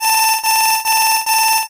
Voice Generated alarm
ALARM, FREE, VOICE-GENEREATED